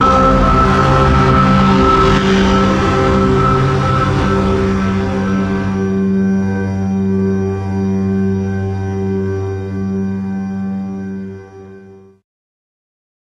Broken Transmission Pads: C2 note, random gabbled modulated sounds using Absynth 5. Sampled into Ableton with a bit of effects, compression using PSP Compressor2 and PSP Warmer. Vocals sounds to try to make it sound like a garbled transmission or something alien. Crazy sounds is what I do.
pads, glitch, artificial, soundscape, atmosphere, industrial, synth, evolving, cinematic, drone, samples, granular, texture, horror, loop, electronic, vocal, experimental, space, ambient, pack, dark